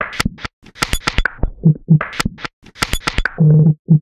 ClickerGroove 120bpm03 LoopCache AbstractPercussion
Abstract Percussion Loop made from field recorded found sounds
Abstract, Loop, Percussion